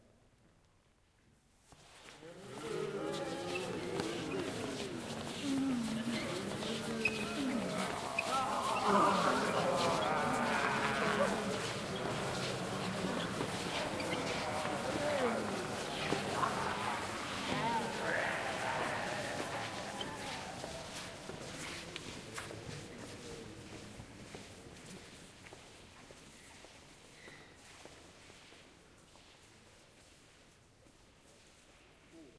Zombie Shuffle INT
Crowd shuffles past recorder - concrete floor - foot shuffles - groans.
Recorded at Melbourne Docklands Studios
4CH Surround Zoom H2N
Mark Edwards
Greenside Productions
Shuffle, groan